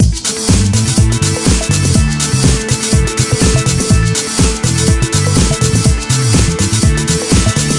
It's a funky house loop composed by a powerful house beat, a good bassline and a synth.